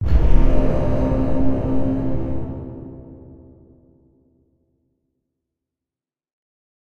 Angry Boat 5
My take on the epic and ominous orchestral "BRRRRRRRRRM" sound often found in movie trailers, such as Inception, Shutter Island and Prometheus. I've nicknamed it the 'Angry Boat'.
This is Angry Boat sound 5, which has a distant, metallic reverb quality. Like a horn echoing through a submarine.
Made with Mixcraft.